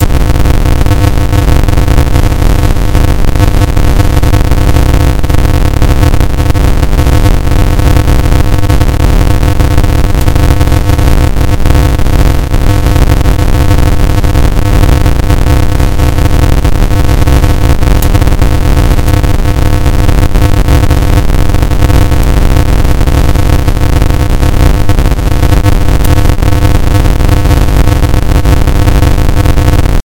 This kind of generates random values at a certain frequency. In this example, the frequency is 200Hz.The algorithm for this noise was created two years ago by myself in C++, as an imitation of noise generators in SuperCollider 2.